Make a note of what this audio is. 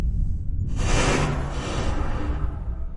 VALENTIN Alexis 2015 2016 ghost-voices
The sound was initially taken in the restroom of an university. A simple Paulstretch effect was added with a 1 factor and a time of 0.25 seconds for not changing to much the length of the original sound. This could be used as a sound in a video game or a movie to make some interactions with ghosts or spirits.
creepy, haunted